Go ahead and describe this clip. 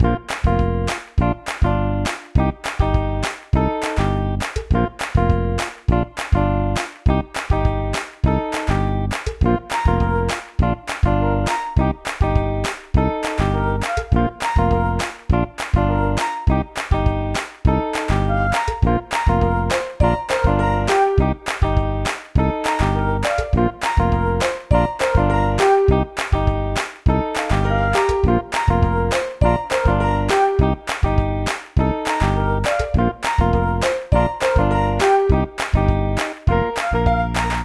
Tropicorp Advertisement
The whole executive team of Tropicorp wants to thank our valued employees.
Did you know: We are innovative & not generic!
Although, I'm always interested in hearing new projects using this sample!
guitar, happy, bass, advertisement, piano, innovative, light, background, clap, music, ad, flute